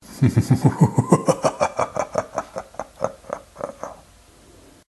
Evil Laugh 2
Bad-Guy, Deep, Evil, Laugh, Laughter, Mad, Scary, Villain